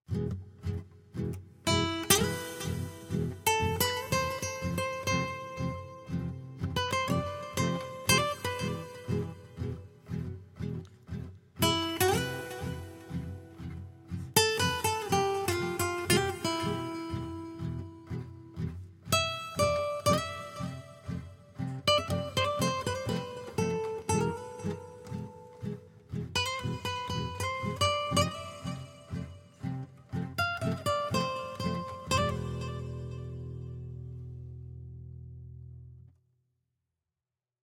Spanishy Guitar Thing
I own a guitar which is a sort of cross between a classical and an acoustic.
I'm playing a simple Emaj to Fmaj accompaniment and noodling over the top trying to sound vaguely Spanish.
Recorded mono with a Rode NT5 condenser microphone.